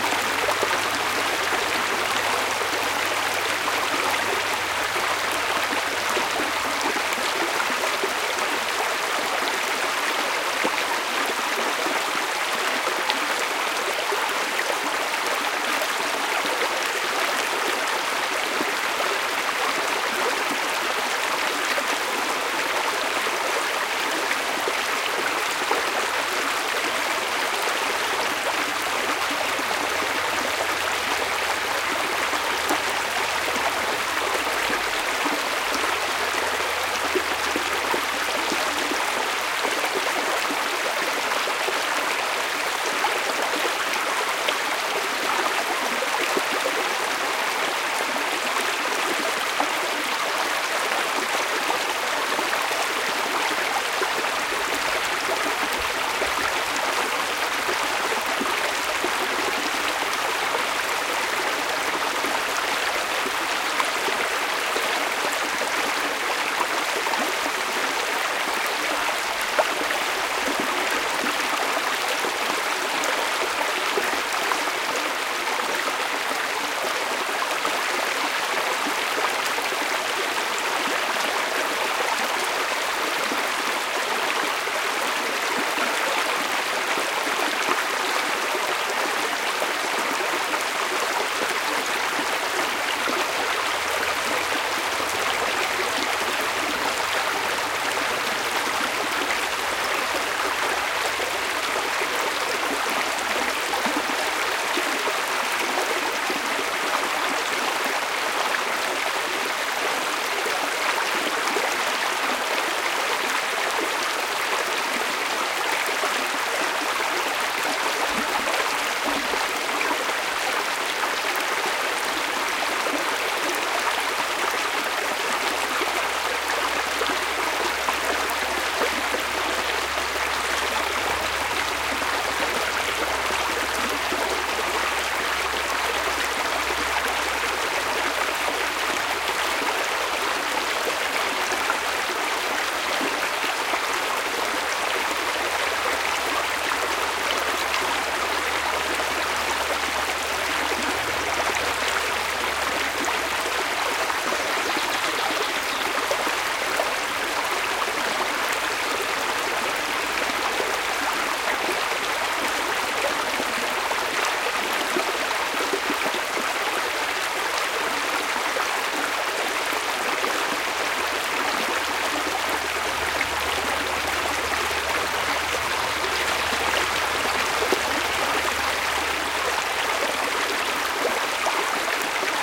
a small stream. Sennheiser MKH60 + MKH30 into Shure FP24 preamp, Edirol R09 recorder. Decoded to mid-side stereo with free Voxengo VST plugin. Recorded at Arroyo El Palancar (Carcabuey, S Spain)
andalusia, babbling, brook, field-recording, nature, south-spain, stream, water